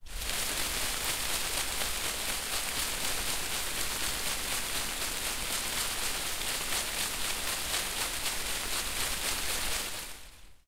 Shaking a small quince tree by the trunk.